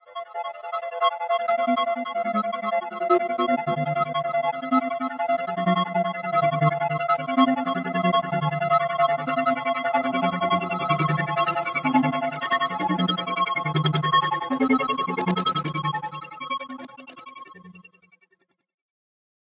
Rhythmic solo flute treatment. Made from live processing of multiple real-time buffers. Enjoy!